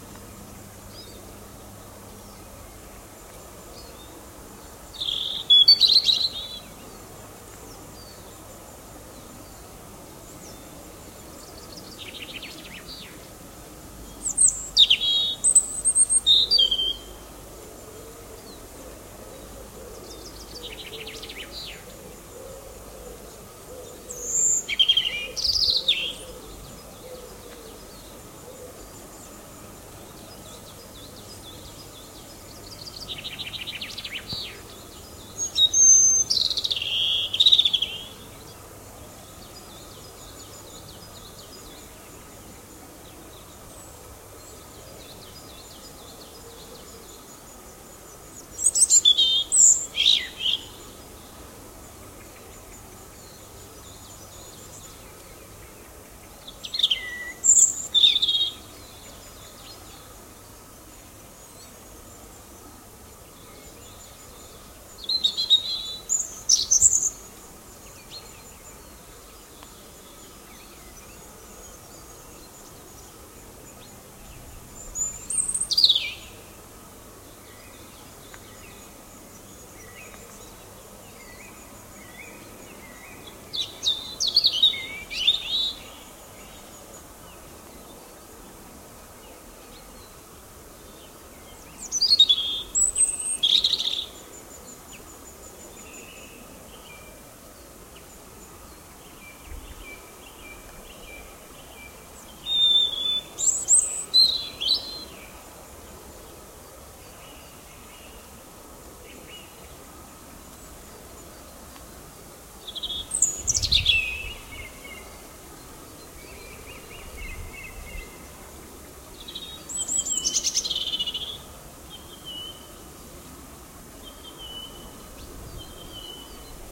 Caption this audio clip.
Birds singing in spring - European robin - Forest - Rotkehlchen
Forest, Bird, Park, Birds, recording, Vogel, Nature, Animal, Natur, outdoor, spring